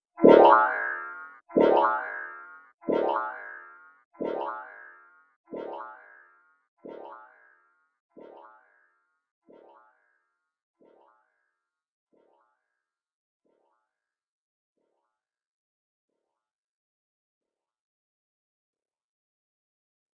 Plughole 1 longest and delay
Synthetic sound out of propellerhead reason 5.1
Delay, Longest, Plughole